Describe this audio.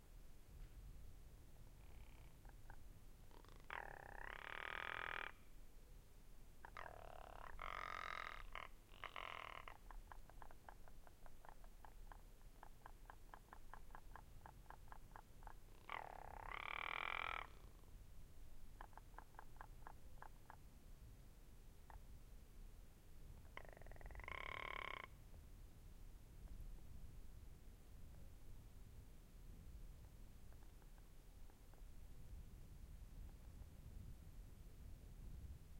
Pic-de-bure-lagopedes
Pic-de bure, France, high moutain, 2700m, birds, ptarmigan shouting, silence